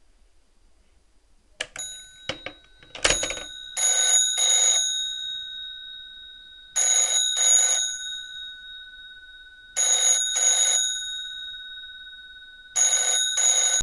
Phone Ringing from a 1930s AWA Phone.
1930s, 30s, antique, bell, phone, ring, telephone